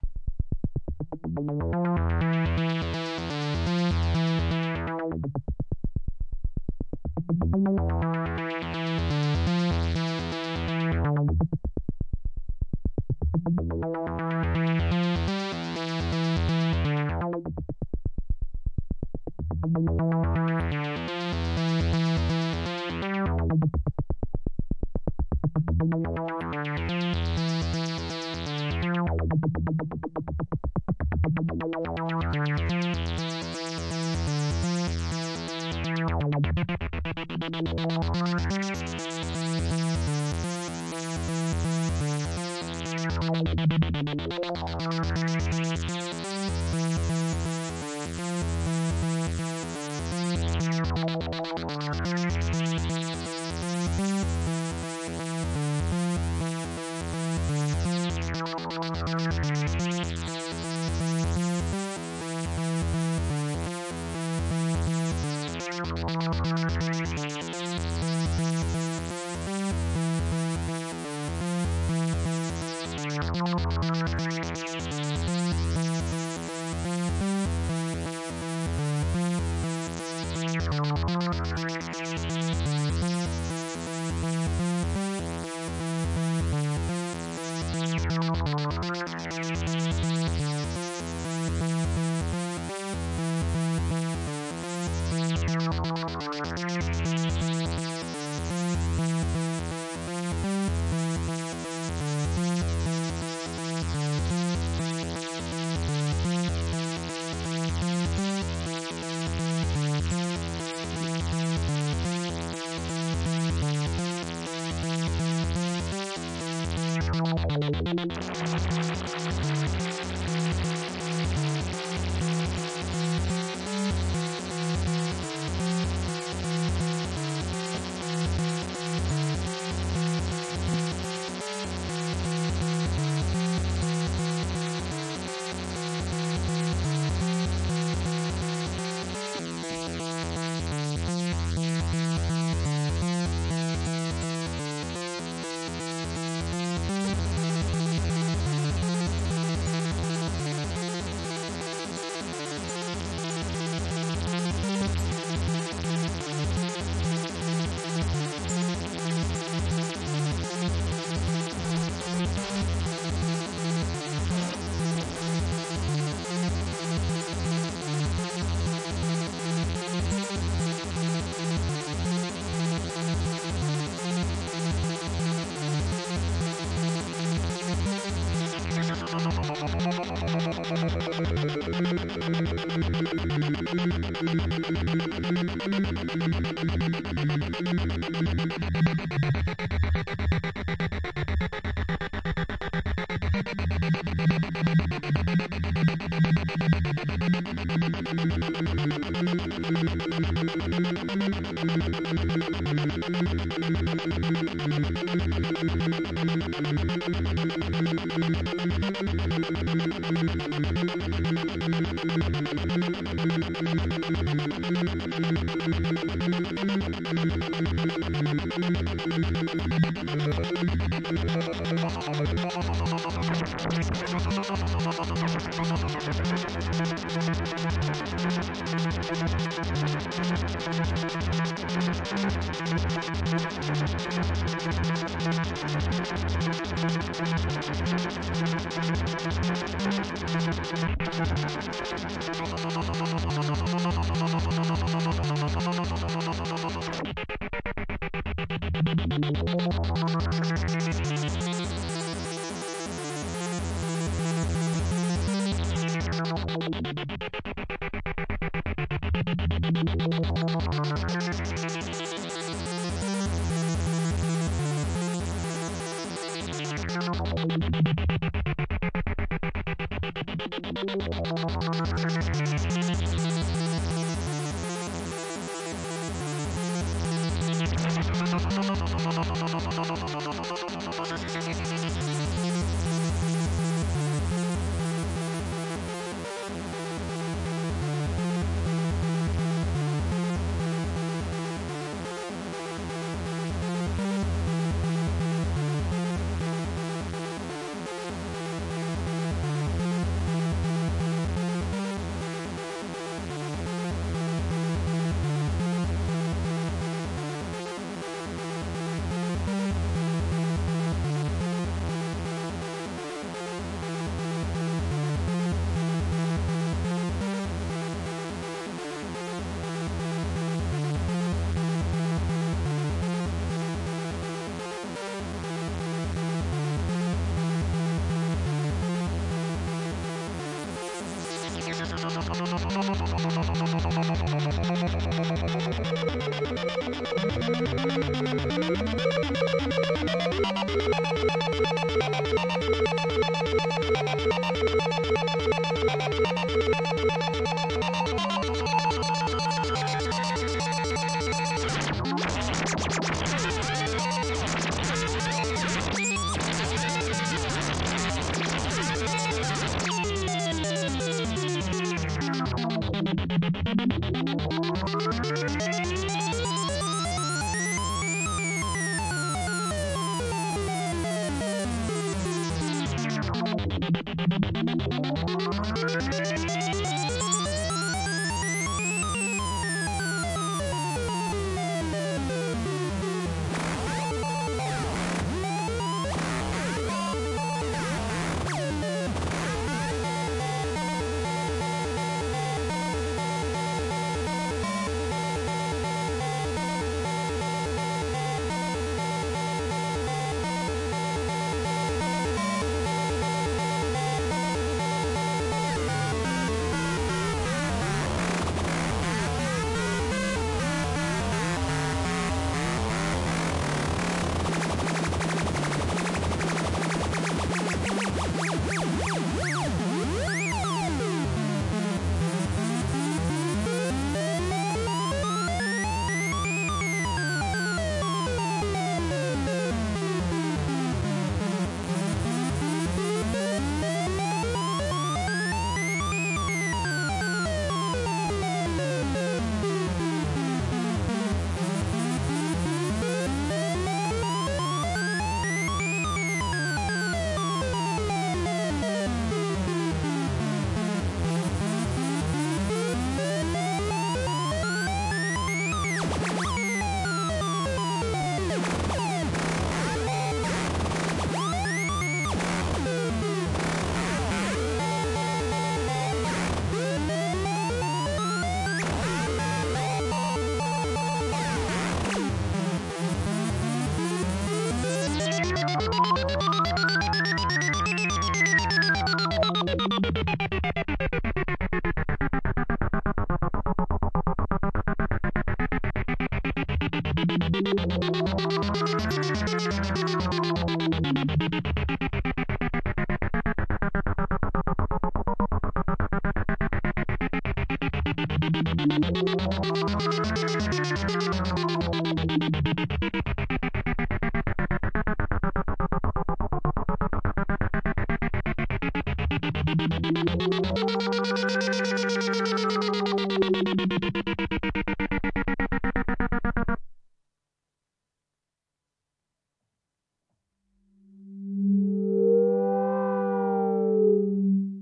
2019-04-12 PO400 124BPM Em
Teenage Engineering PO-400 Modular Raw Output
124 BPM
April 2019
po-400, fm, sequenced, loop, improvised, pwm, recorded, modular, teenage, experimental, glitch, engineering, portland, analog, electronic, live, synth, noise, oregon, evolving